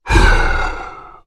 A powerful low pitched voice sound effect useful for large creatures, such as orcs, to make your game a more immersive experience. The sound is great for attacking, idling, dying, screaming brutes, who are standing in your way of justice.
arcade, brute, deep, fantasy, game, gamedev, gamedeveloping, games, gaming, indiedev, indiegamedev, low-pitch, male, monster, Orc, RPG, sfx, Speak, Talk, troll, videogame, videogames, vocal, voice, Voices